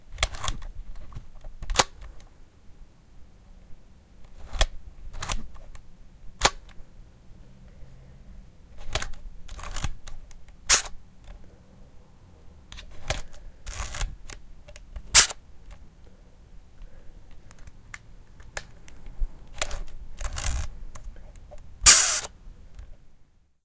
Camera Shutter Fire: 1930s Rangefinder
This is the sound of me firing the shutter of a Contax 1935 rangefinder at different speeds.
contax, click, shutter, photography, vintage, camera